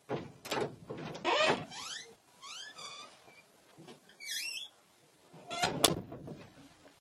door-squeak
A simple recording of a door to a domestic room being opened and closed noisily
door, squeak, squeek, slam, creak, creaky